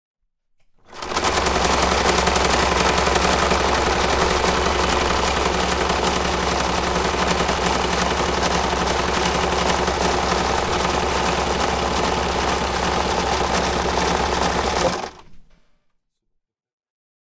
This is a recording of a sewing machine. Recorded on SONY PCM-D50
hen, machine, N, Sewing